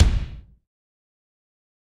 Combination of 2 Kick drums sounds to create one awesome hard kick.
Drum, Kick, Layered, Hard